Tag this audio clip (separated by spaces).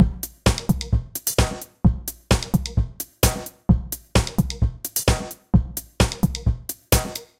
de bateria loop